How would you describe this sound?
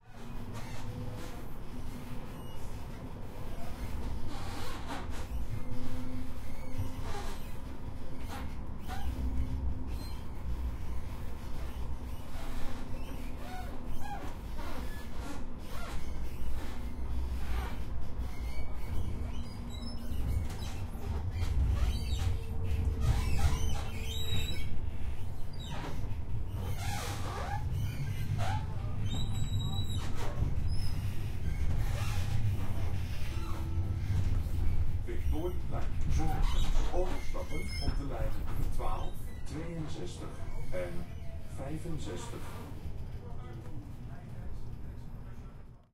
Squeaky Tram interior in Amsterdam
city, squeaky, field-recording, traffic, ambience, noise, trams, street, Netherlands, Amsterdam, tram